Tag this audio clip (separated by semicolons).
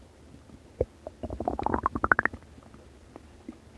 bubble; groan; liquid; stomach; guts; digestion; human; gurgle; intestines; body